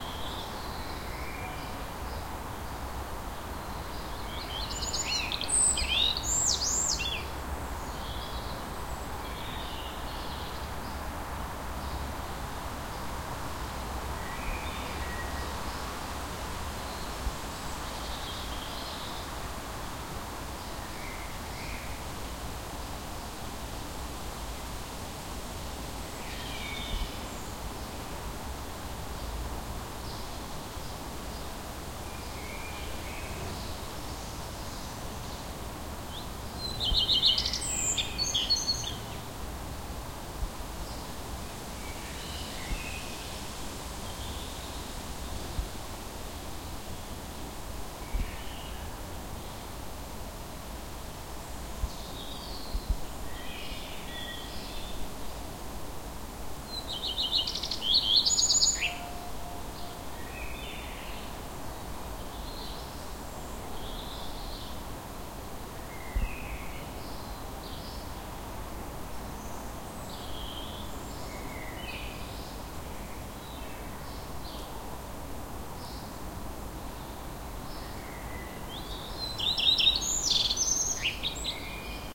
Nõmme Morning June
Early morning in a Northern european suburb in June - some birds, light city ambience from distance
birds, neighborhood, residential, suburban, suburbia, suburbs